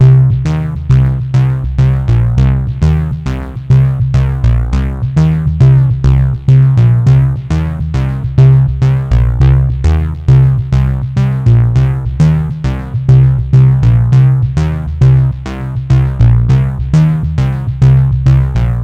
This is a sequence made from a bass stab that came from a Future Music Sound CD (free rights) then effected in free tracker program, Jeskola Buzz. Recorded at C2 44kh stereo ~ Enjoy :)